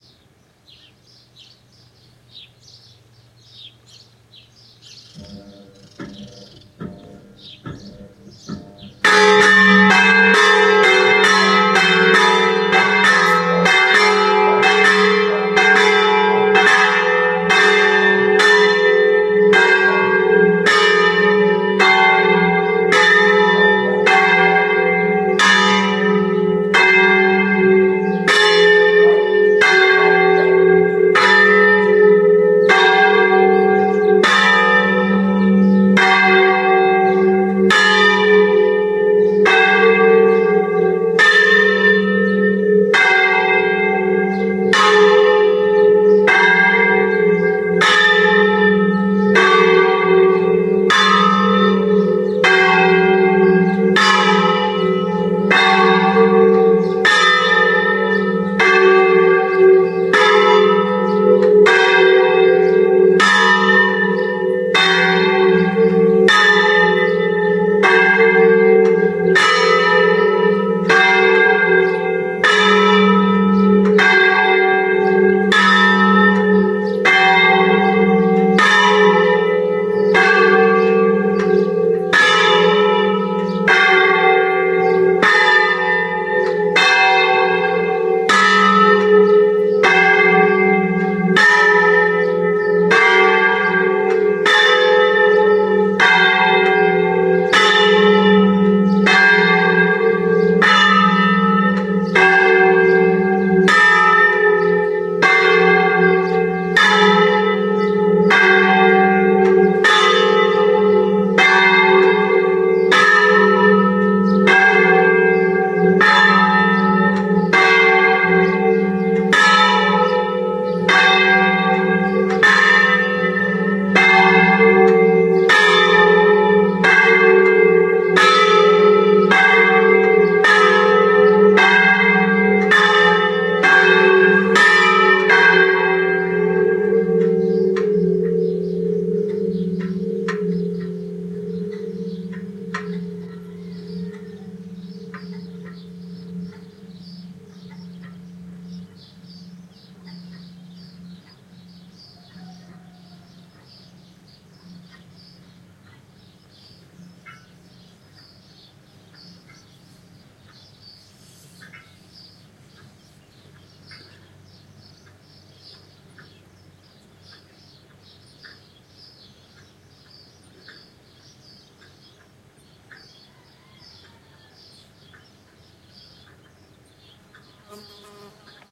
sorana bells 0845sunmass
A rather long on-site recording of a call to Catholic mass(?) at 0845 Sundays in Sorana, Italy.
I left the preliminary bird and local dog bark sounds in as it gives a sense of location or place, it is a working village in the mountains of Tuscany.
Note the long trail-off in the bell vibration and the "clunk-clunk" of the bell ringing/support gear.
I think in this recording you hear a two second windup of machinery before the bells actually start ringing.
At the very end you hear a blowfly recorded by the Sony camcorder i was using at the time.
Enjoy.
chimes, italy, ring, bell